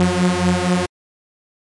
trance (saw00a1-beta)
this is an in-use example of the saw lead to give you an example of what my saw effect is supposed sound like (yours might sound better)
lead, saw, techno, trance